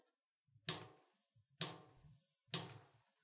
water drops in a bath tub
bath-tub, drops